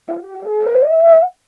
Rhinos do not actually trumpet, but in Ionesco's play Rhinoceros they do. This is the sound of a fictional trumpeting rhinoceros created using a French horn and some editing. The rhinoceros is expressing a longing for something. Thanks to Anna Ramon for playing the french horn.